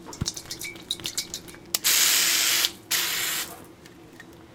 PAM spray
shaking and spraying cooking spray
cooking-spray, spray, bottle-shake, pam